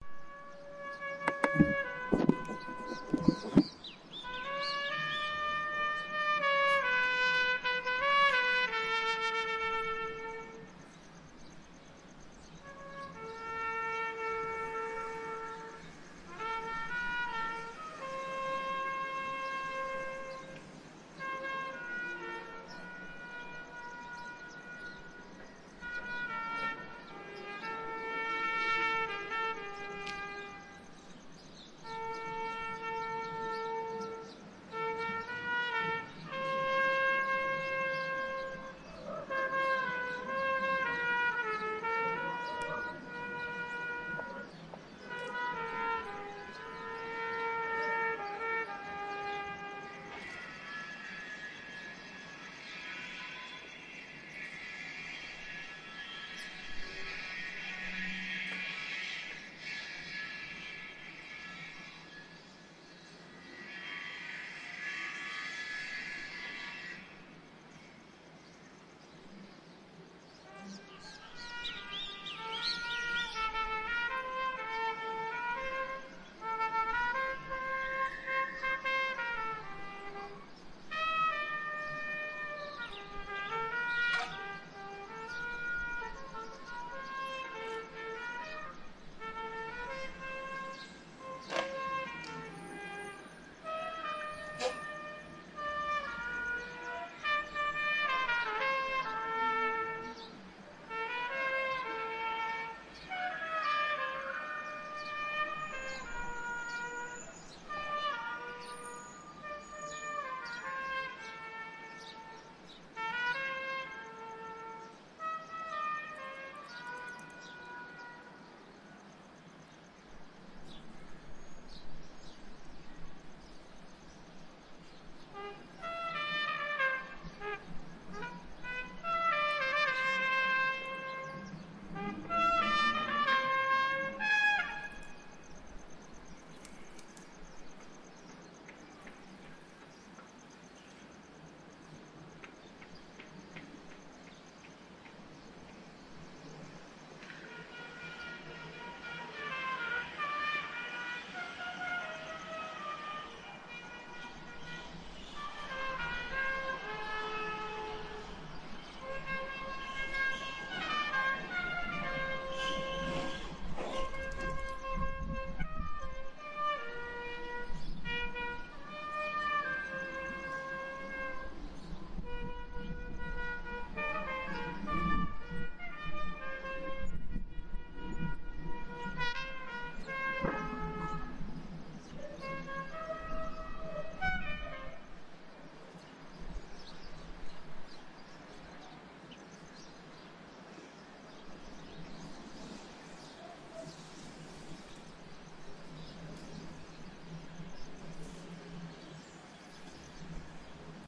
vamobelgrano / Trumpet / Belgrano
trompreta grabada en una terraza de barrio alberdi, cordoba, argentina
trumpet test songs from the tribune of the athletic club Belgrano,located in cordoba, argentina
i used only Roland R26 handle recorder.
alberdi, CAB, city-melodie, ClubAtleticoBelgrano, Cordoba, Pirata, trumpet, vamosavolver